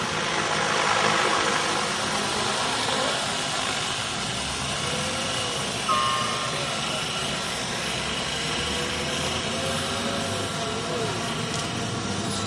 RC helicopter in the middle of a city
A recording from a Canon Optura Xi of a RC helicopter flying in a city plaza.
ambience, rc